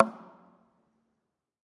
Recordings of different percussive sounds from abandoned small wave power plant. Tascam DR-100.
field-recording, ambient, fx, plastic, drum, hit, industrial, percussion